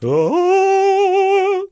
Bad Singer (male) - Mal cantante (masculino)
I recorded some funny voices from friends for a job.
Grabé algunas voces graciosas con unos amigos para un trabajo.
GEAR: Cheap condenser mic/presonus tube.
EQUIPO: Micro de condensador barato/presonus tube.